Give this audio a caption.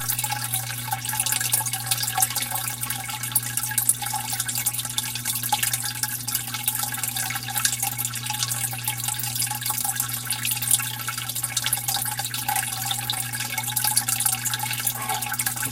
Inside the fish tank where 2 80 cent fish live with a new $30 filter setup.... that is until the last tetra died yesterday, remind me to remove his bloated corpse before the last surviving molly goes cannibal.
tank, river, filter